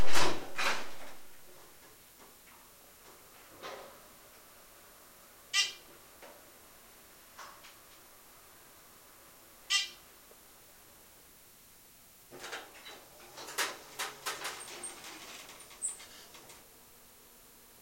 The sound of being inside of the elevator and getting off.
ding-noise, inside, up